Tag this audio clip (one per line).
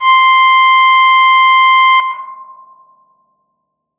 pad
reaktor
saw
multisample